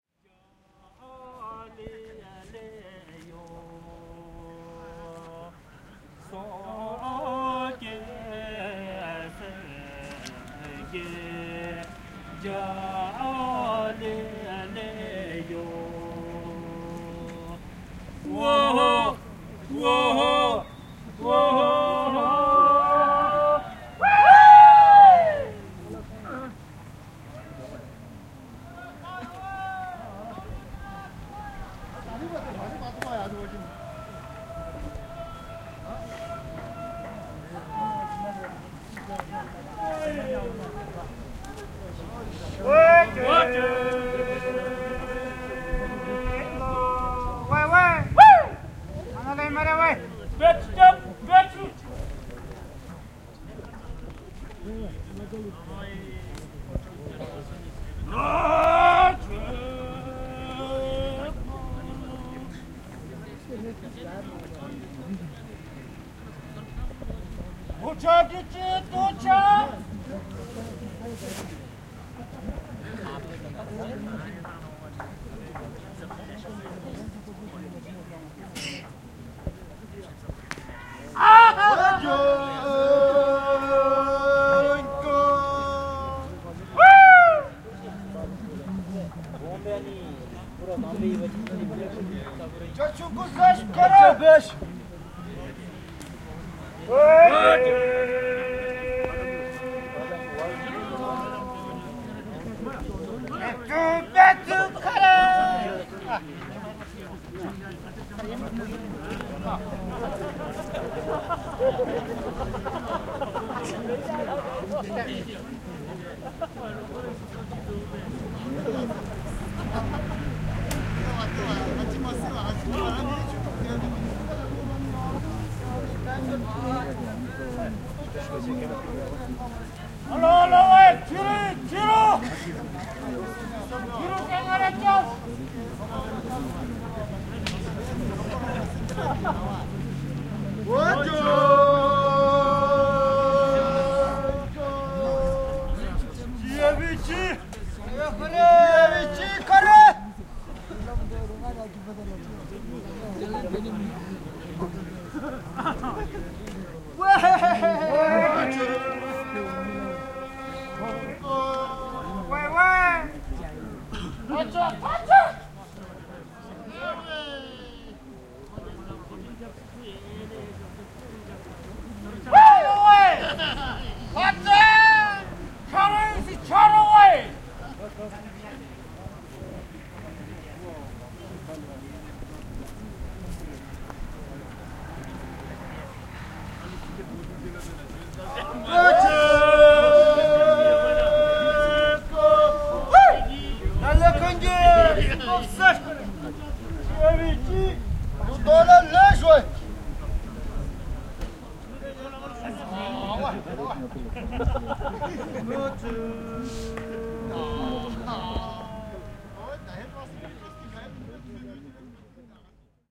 Datse Match - Bhutan
Datse (archery) is the national sport of Bhutan. Contestants compete as teams, singing songs of encouragement and using the latest carbon-fiber composite bows. Opponents attempt to distract the bowman with jokes and lewd stories.
Recorded in Paro, Bhutan.
mini-disc
archer, arrow, bow, bowman, shoot, sing, singing, target, traditional